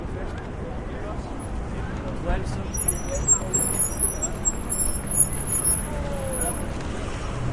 Sidewalk Noise with Car Breaks Squeeks
breaks, car, city, crossing, field-recording, new-york, nyc, public, sidewalk